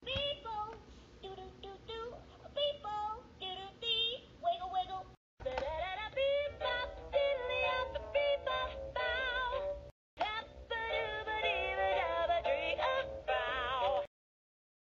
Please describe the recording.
Children's Toy Scatting Audio
Three samples of two different toys with audio of child sounding scatting. You wouldn't think I'd find three instances of this in one day, but I did, and all of it is pretty good for weird sampling.
children, gibberish, groove, jazz, kid, low-quality, sample, scat, sing, toy, vocal, voice